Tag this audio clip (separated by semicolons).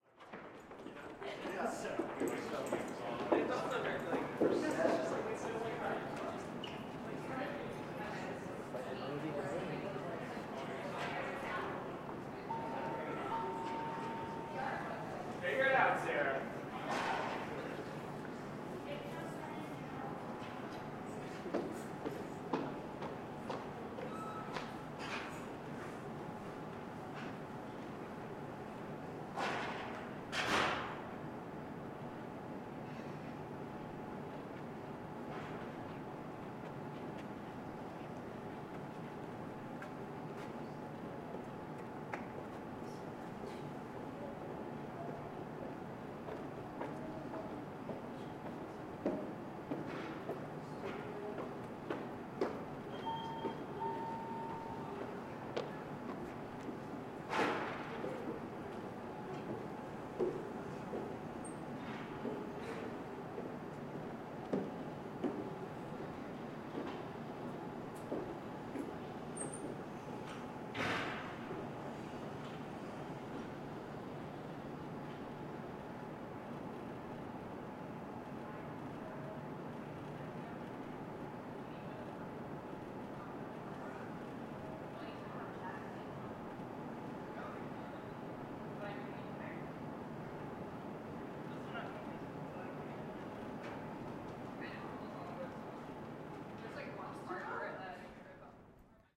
atmosphere; house; play